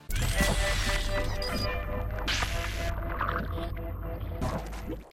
Simple Mutate (Monster)
Sound used for the morphing of a monster.
Effect, Monster, Sound, UFO